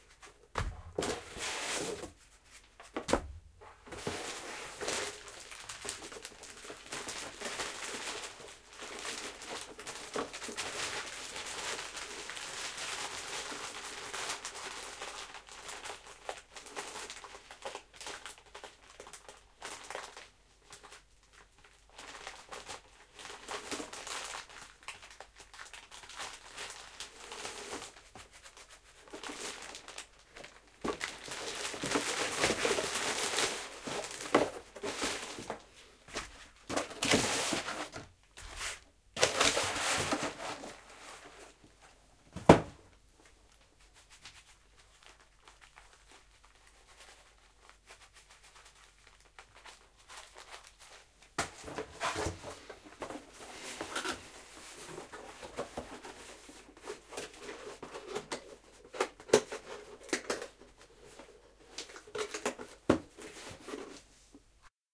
Cardboard Box Wrapping Paper Open Close
Bag, Box, Cardboard, Floor, Paper, Place, Plastic, Unboxing, Wrapping